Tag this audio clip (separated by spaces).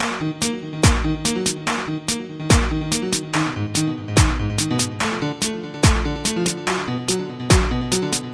loop flstudio techno